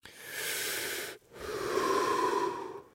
Deep Inhale & Exhale 1
Short Deep breath, Inhale and Exhale, recorded in a treated room with a Blue Yeti USB Mic.
Thank you for using my sound for your project.
inhale, deep-breath, focus, mouth, gasp, stress, exhale, relaxation, breath, breathe, stressed, anxiety, breathing, ambient, relaxed